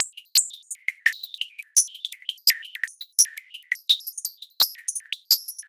Zajo Loop22 02 classic-liquified-rwrk
a few experiments processing one of the beautiful hip-hop beat uploaded by Zajo (see remix link above)
a classic liquifing filter stereo lfo over 11 semitones pitched up beat. it can be useful for dub and wet compositions